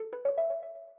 Short noise/ sound for notifications in App Development.
The sound has been designed in Propellerhead's Reason 10.